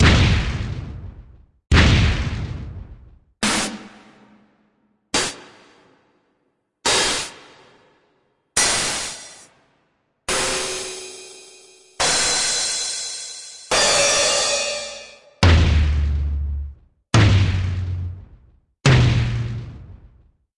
An absolutely GIANT kit. Kick drum sounds like an explosion (yet has a nice, crisp attack) and the snare sounds like cross between a 50cal bullet being fired and a steam pipe being broken.